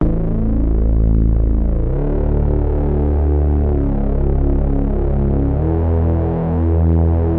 Dirty Portamento Bass
Thsi sound was created using a Nord Rack 2X with third partie effects applied.
Dance, Loop, Electric, Music